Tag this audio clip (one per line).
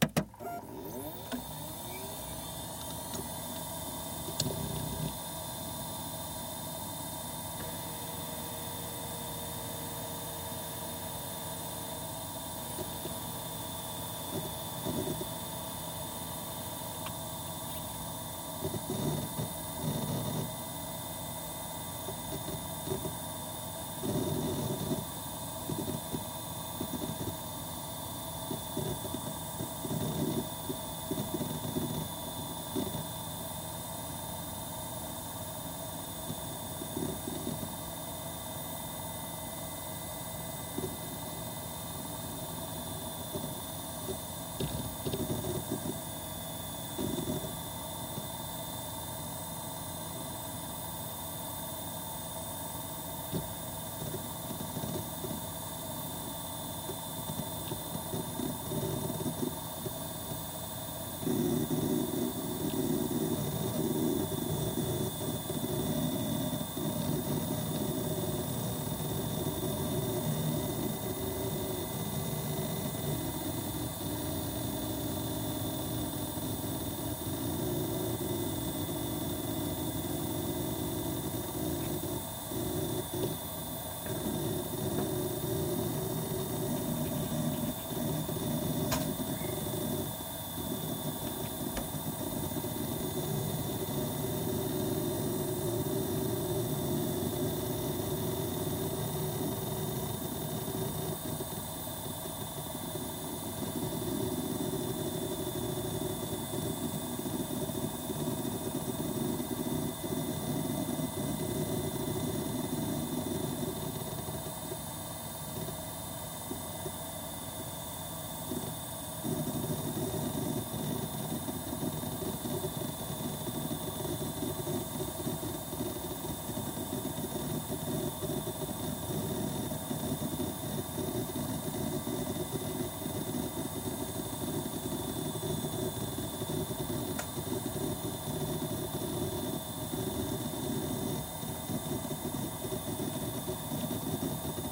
booting-up
bootup
computer
hard-drive
machine
mechanical
motor
operation